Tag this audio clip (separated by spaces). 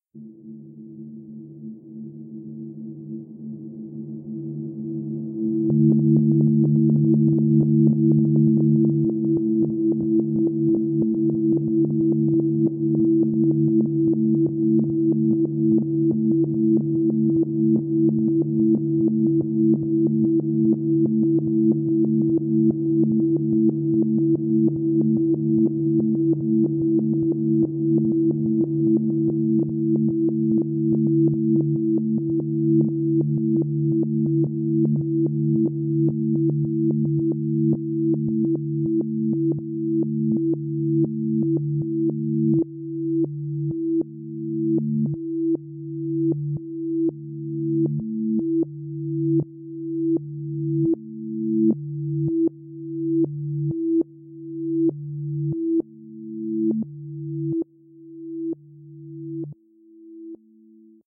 electric freaky machine satellite sfx soundeffect space